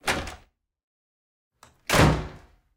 door wood apartment interior open close fast slam
recorded with Sony PCM-D50, Tascam DAP1 DAT with AT835 stereo mic, or Zoom H2
apartment, close, door, fast, interior, open, slam, wood